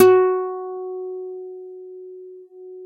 Looped, nylon string guitar note